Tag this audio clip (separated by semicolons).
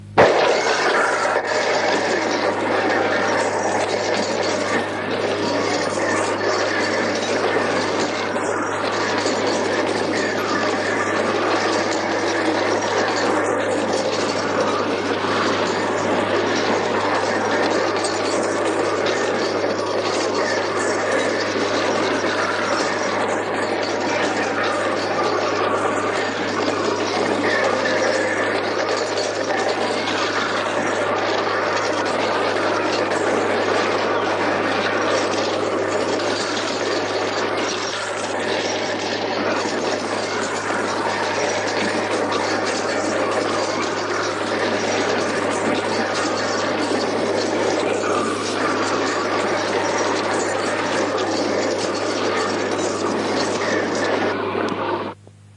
mix; artificial; old; c-cassette-recording; breaking